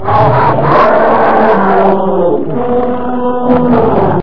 Made with audacity, by lowering and highering the following animal sounds:
cougar, elephant, rhino, and lion